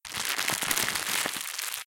Wrapper Flare / Pop 13
Wrapper Flare / Pop
crackle, crackles, crackling, noise, noises, pop, popping, pops